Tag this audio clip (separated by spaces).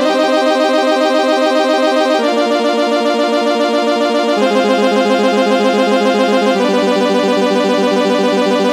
110bpm synth